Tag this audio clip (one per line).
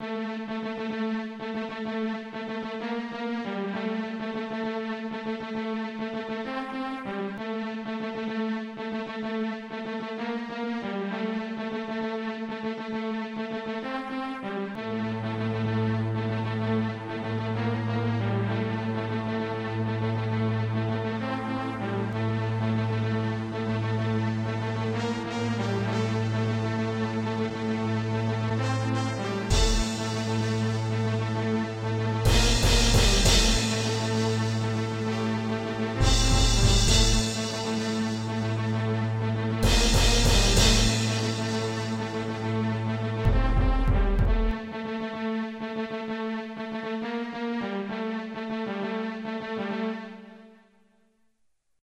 battle
epic
military
music
rising
song
tension